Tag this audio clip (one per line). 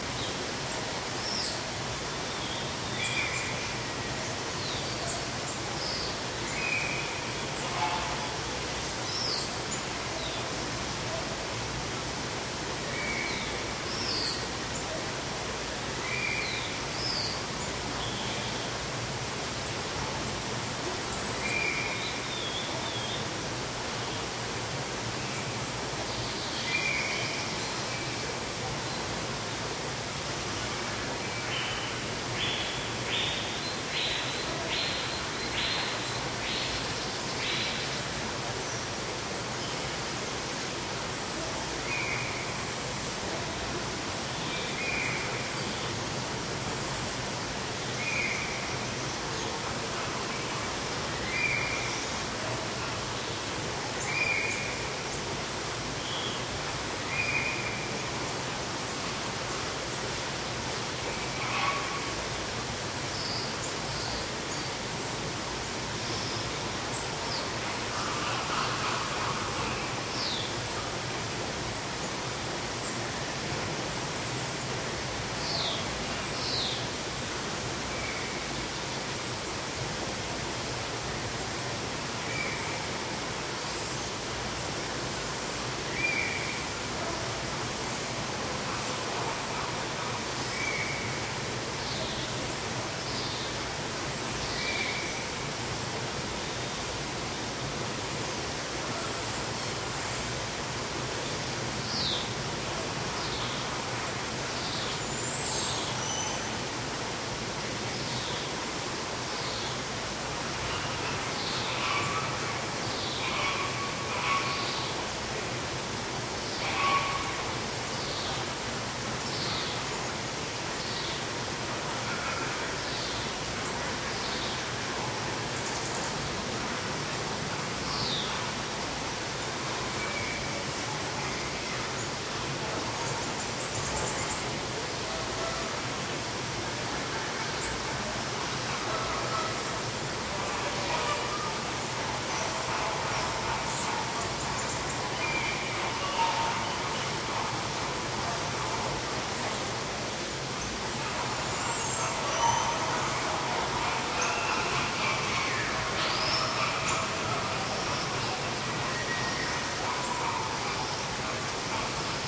field-recording
rainforest
flamingo
indoors
tropical
exotic
water
zoo
birds
aviary
waterfall
tanager
hummingbird
aquarium